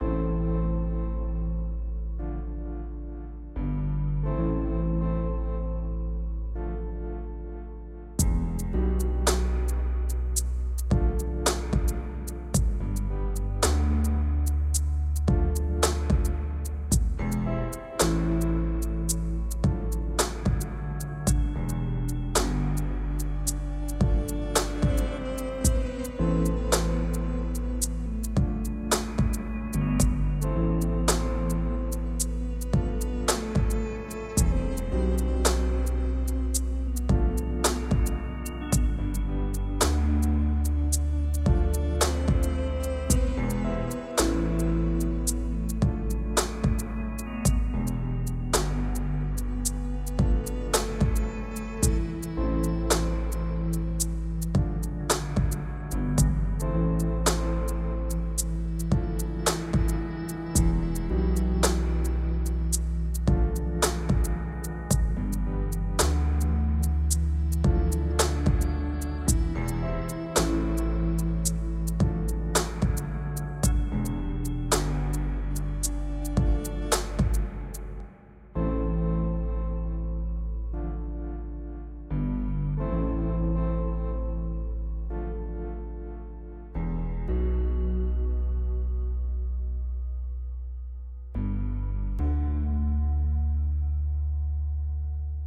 jazzy_drum&base.;
Syths:Ableton live,silenth1,Reason.